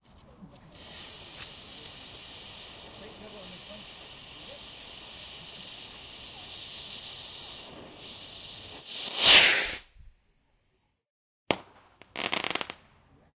Firework - Ignite fuze - Take off - Small expolosion - light fizzle spark
Recordings of some crap fireworks.
Firework, Bang, whoosh, Fizz, pop, Boom, ignite, rocket, fuze